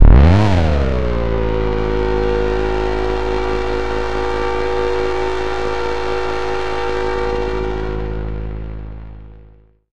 This sound belongs to an original soundpack containing 29 samples created through the idea of imagining hidden realms of existence and reality using synthesizers and effects.